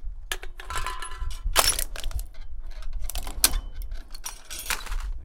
Crushing an aluminum can in the backyard with our can crusher.